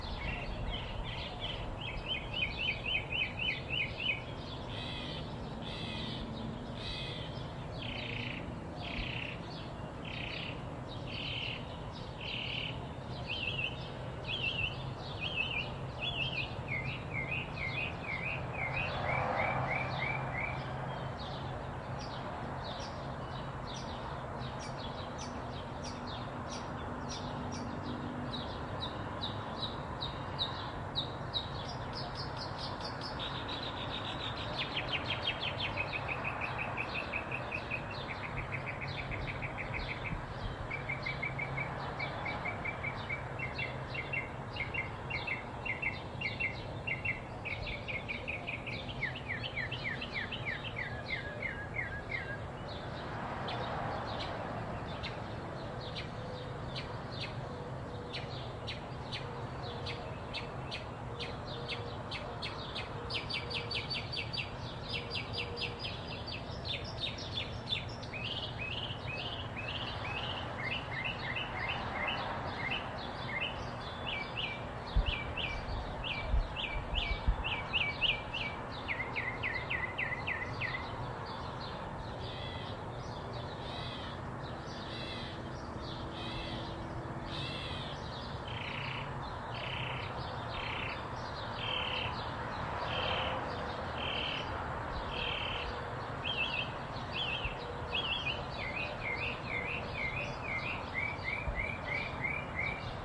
Recorded at dawn in the summer in large open courtyard of an apartment complex in central Phoenix Arizona. Variety of birds, faint city ambience, perhaps distant air conditioners.
Recorder: Zoom H1
Processing: none